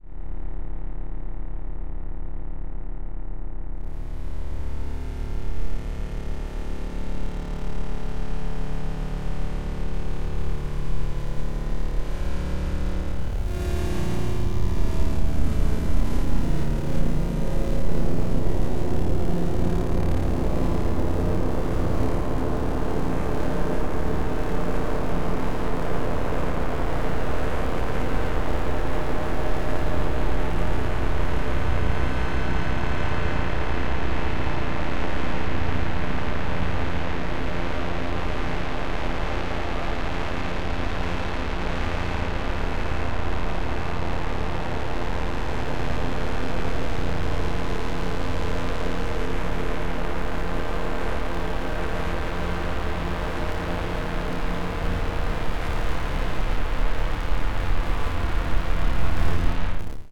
Bakteria Menace 1
Space bakteria has finally arrived to menace and threaten your neighborhood, relatives, and pets.